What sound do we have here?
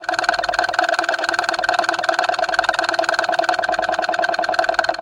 Squeaky and rattly timer-plug recorded onto HI-MD with an AT822 mic and lightly processed.